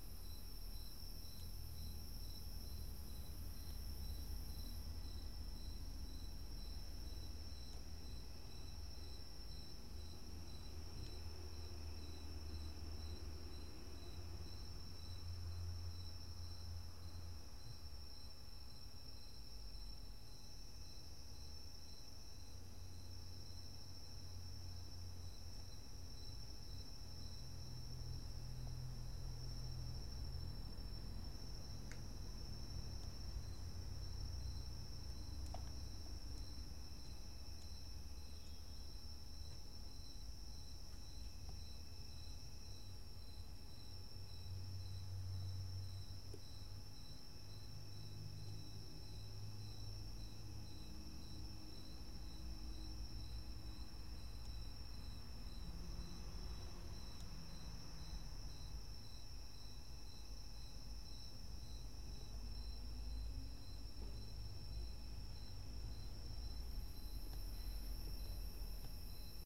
1. Ambiente noche Night ambient
the sound of the night in my room
ambient, bugs, lonely, night